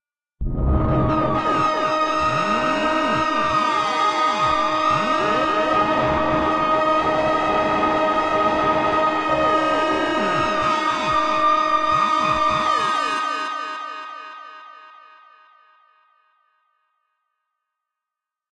Sci Fi Growl Scream D#
A strong, aggressive electronic growl/scream.
Played on a D# note.
Created with Reaktor 6.
torment, scream, effect, futuristic, noise, freaky, synth, sound-design, sounddesign, science, strange, scary, fx, tortured, robot, growl, sfx, abstract, soundeffect, machine, weird, apocalyptic, sci-fi, digital, fiction, future, glitch, electronic, angry, mechanical